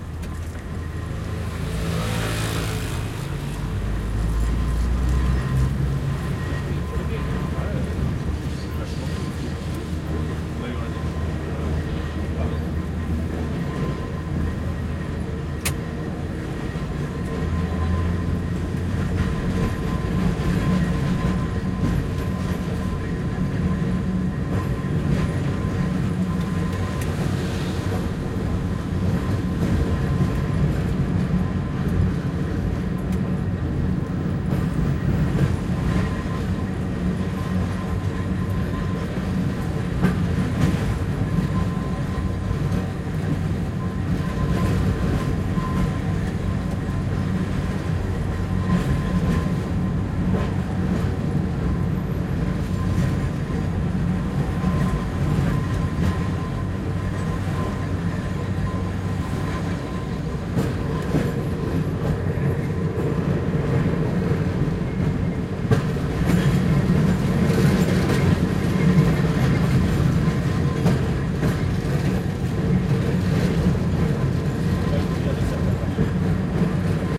blue tram 1
Riding "blue tramway" old tram in Barcelona, Spain. Recorded with Zoom H4.
You can find also part II-blue_tram_2, made at the same day.
city, field-recording, traffic, tram